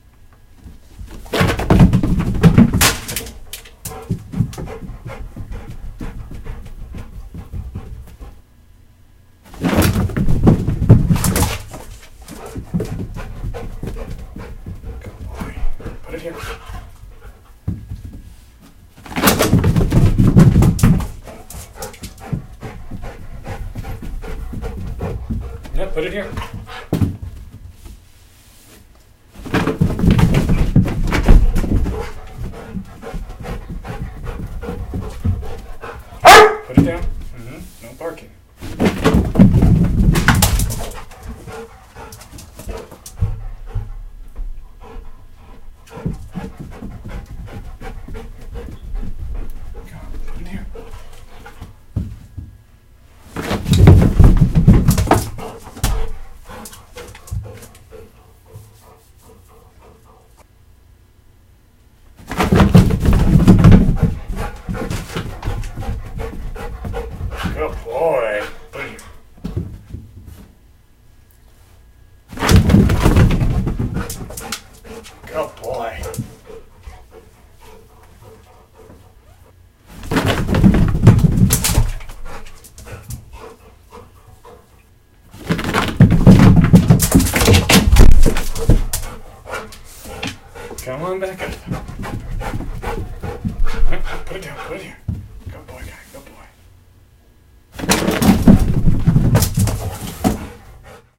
dog on stairs
A cartoonish sound of feet scrambling or pattering at a fast run. Could also be used for a tumbling sound. Was created by having a medium-sized dog chase a tennis ball down a flight of stairs.
tumble, tumbling, dog, feet